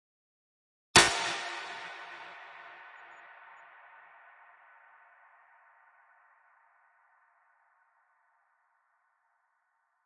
Synth Stab 3
A short abrasive synth stab with lots of a large space reverb.